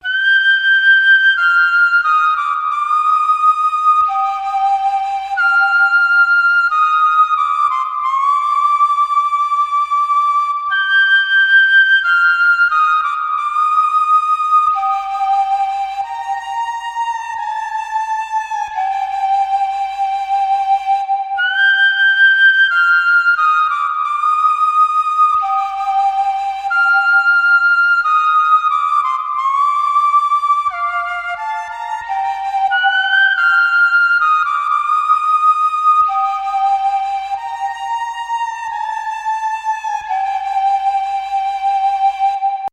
An artificial dizi flute melody made in Logic Pro X. I took inspiration from a piece from an old lost anime with no released soundtrack, as far as I know, and tried to replicate it with some variations, I think I did a pretty good job. 90 bpm.